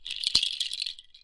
African Shells Shaker
bell, shells, wind, shaker